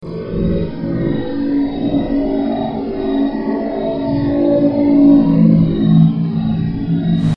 Ambient Space Ship
this is the sound sample of a star cruiser hovering in low orbit, in atmosphere
ambient, scfif, starcruiser, space